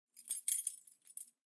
Keys Jingling 1 7
Jingle, Lock